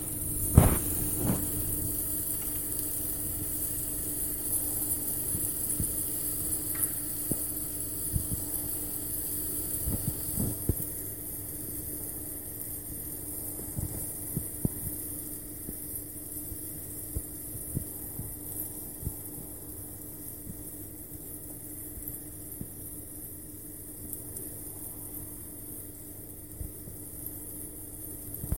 Cooking with oil